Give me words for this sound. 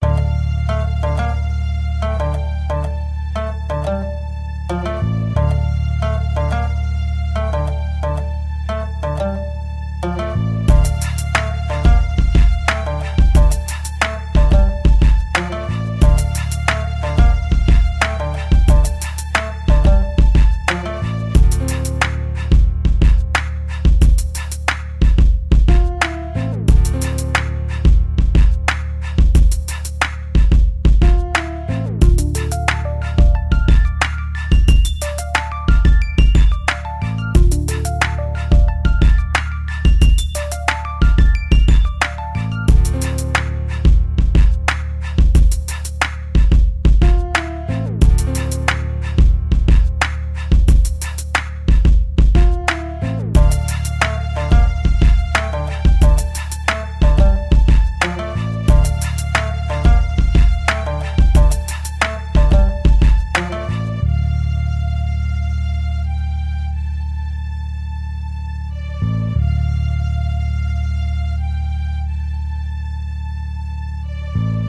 Hip Hop BACKGROUND
Made sound with Magix Music Maker. This is a very laid back sound. It may be too long.